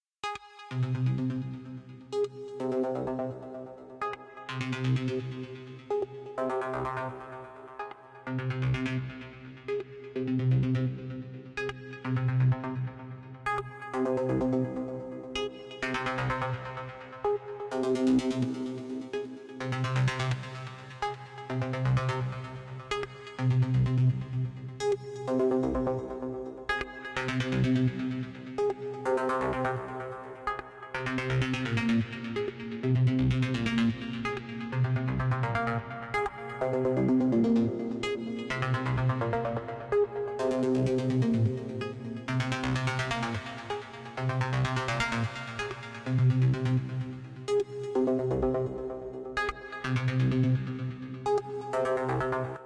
Nice full spectrum sound for electronic music made in ablton
ableton, ambient, electronic, space, sylenth